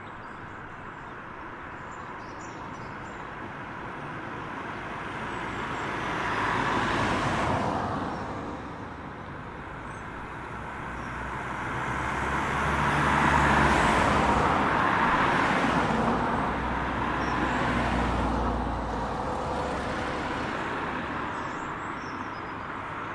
Traffic Slow UK Suburb 01
English residential street. Passing traffic and bird calls.
Birds, England, Gosforth, Newcastle-upon-Tyne, Residential, Street, Suburb, Traffic, UK, Vehicles